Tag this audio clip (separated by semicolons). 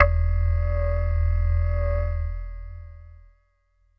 keyboard; keys; multisample; reaktor